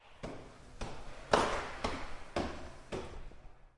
sound 12 - walking upstairs
Sound of some footsteps along some stairs.
Taken with a Zoom H recorder, near the feet and following them.
Taken in the stairs that takes to the -1 floor.
campus-upf, down, footsteps, stairs, steps, UPF-CS14, upstairs, walk